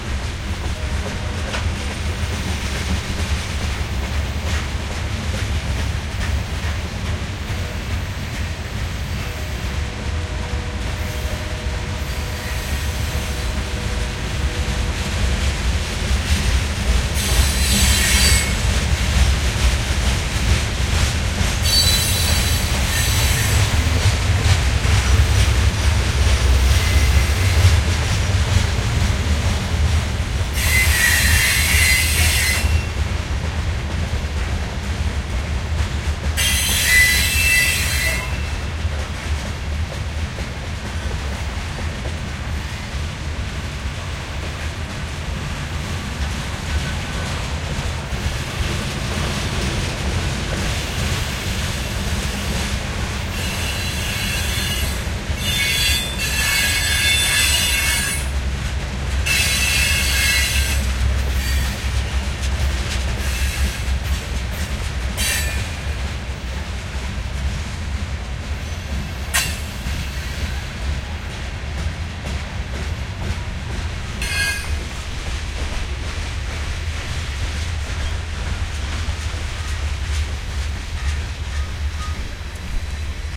freight train pass good detail
pass, train